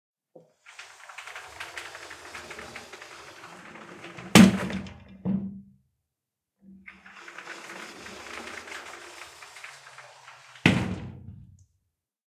20170101 Slide Glass Door 01
Sliding a glass door, recorded with Rode iXY.
open, door, sliding, opening, close, gate, closing, slide, glass